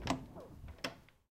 Opening the door to a small room. (Recorder: Zoom H2.)